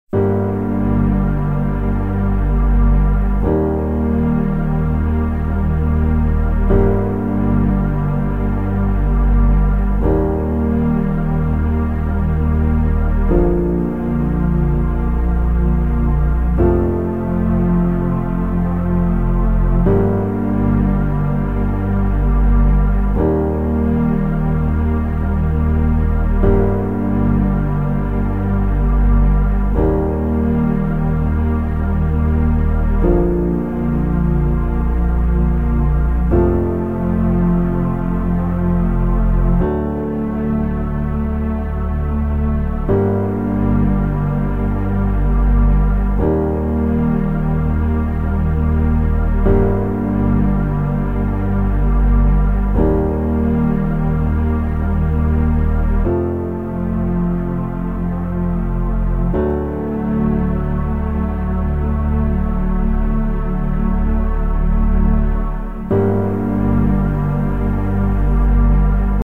Moody Piano/Strings Chord Progression